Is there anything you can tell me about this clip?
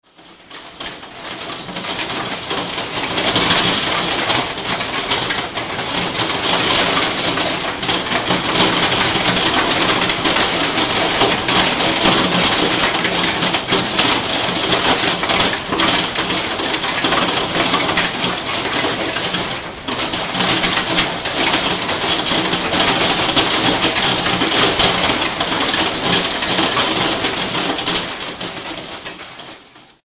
terrible noise made by hailstones on roof windows, May 2013